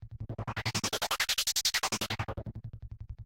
Short sound effects made with Minikorg 700s + Kenton MIDI to CV converter
Minikorg-700s, Korg, FX